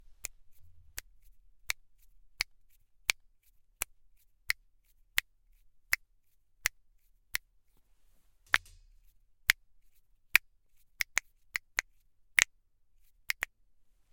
snap fingers
Snapping of fingers. Recorded with Motu 896 and Studio Projects B-1. In the Anchoic chamber of the HKU.
anechoic, field-recording